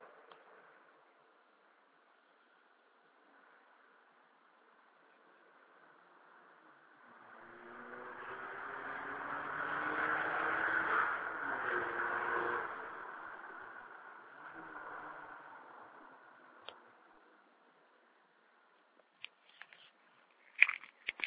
Remote competition. Sound of engine about 1 km far. Recorded by Nokia 6230i.
allye, competition, remote-engine-sound